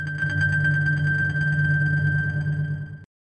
Haunting piano sample.
bleak, cold, crushed, ice, isolated, nature, remote, storm, weather, winter